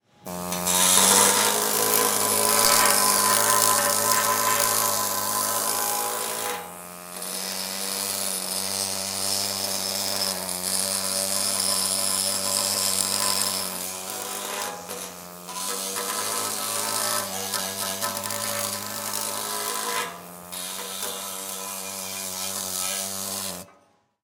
The sounds of an air chisel
industrial, factory